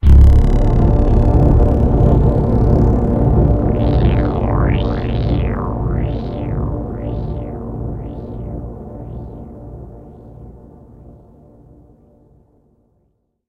Deepened Hit
A hit taken from a mid track break in one of my recent works. It's 2 bass synths compressed and processed, with a harmonic ambient tone, and a 303 stab which is processed, delayed and stretched in the middle for continuity and good taste.
synthetic, hit, power, soundscape, textured, bass, processed, compressed, bed